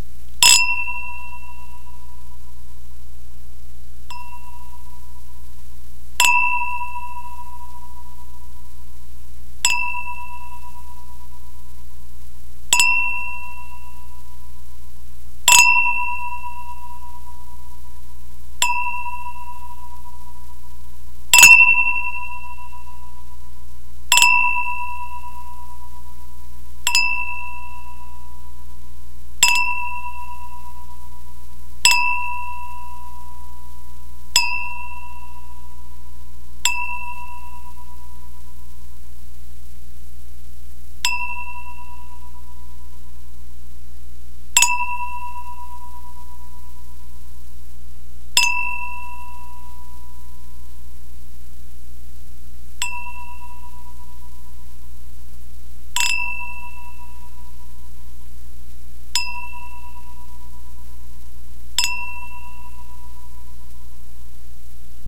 The clinking of two crystal wine glasses, initially filled with water. Recorded with a cheap Labtec LVA-8450 headset. Mono, unprocessed.